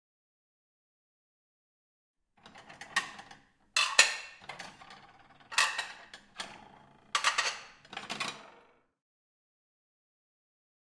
Sounds of plates. 5 of them. Strong noise.
Czech, Panska